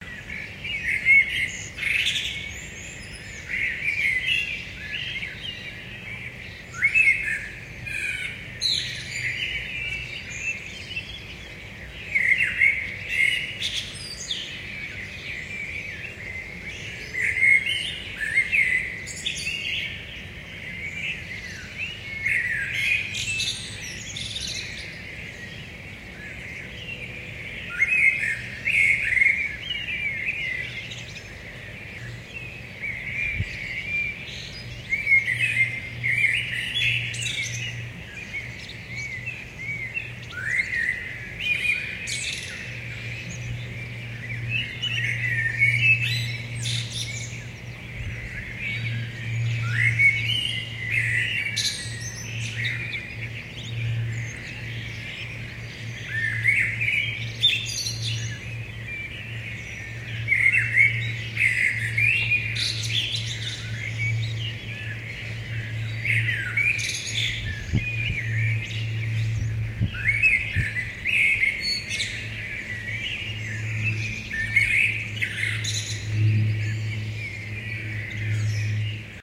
Spring singing of Dutch birds
Audio recording from the attic window.
Small Dutch town Duiven.
Mid 2000's